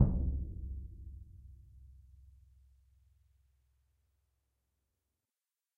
Symphonic Concert Bass Drum Vel15
Ludwig 40'' x 18'' suspended concert bass drum, recorded via overhead mics in multiple velocities.